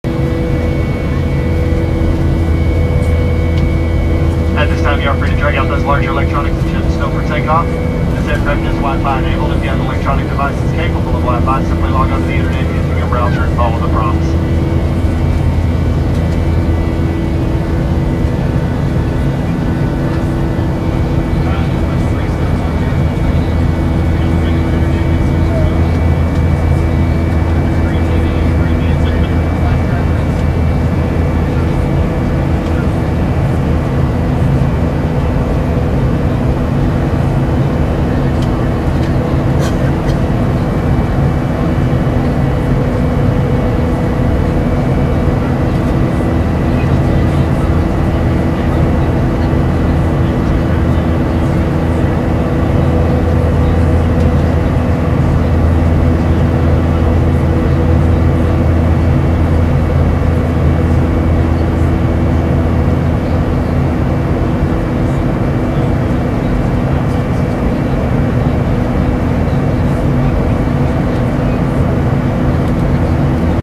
Commercial Aircraft In-Flight Instructions 2
Commercial aircraft flying from Tulsa to Phoenix. Having just reached 10,000 ft, the flight attendant announces in-flight instructions.
cabin, aircraft, flight